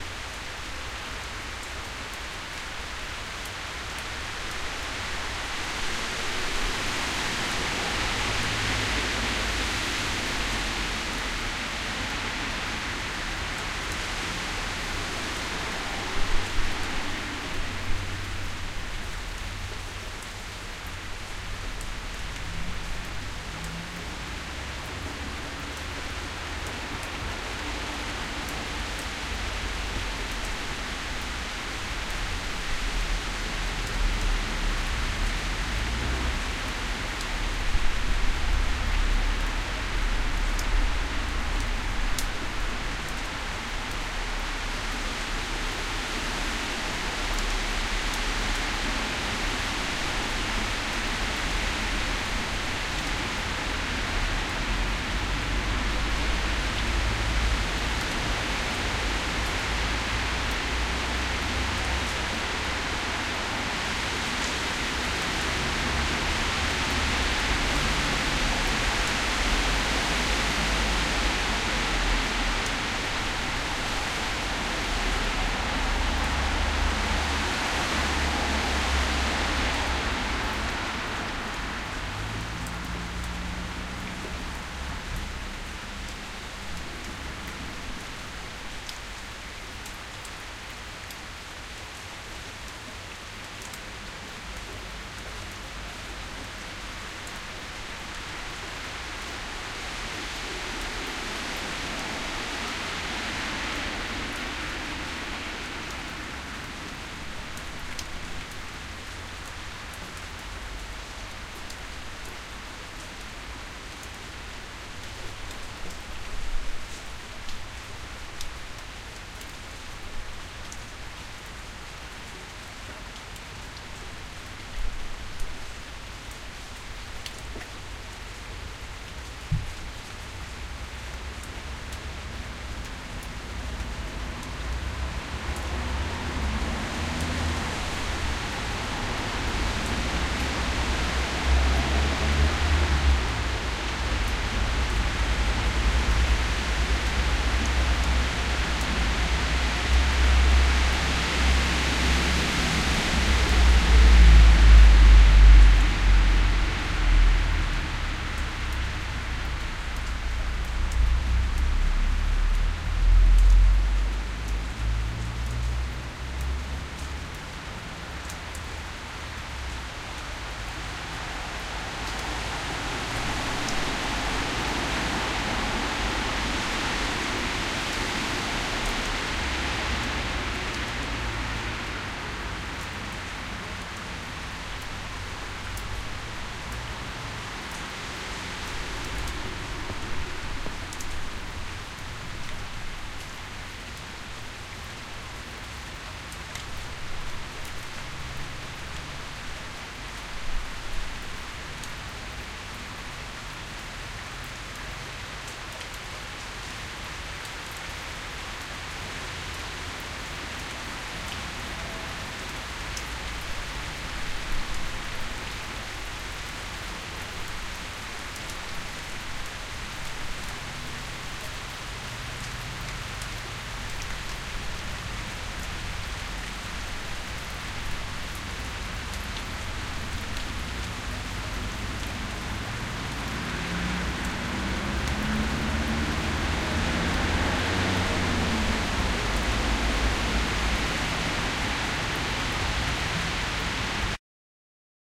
Rain falling on road with moderate traffic
Heavy rain outside falling onto a small road with light traffic.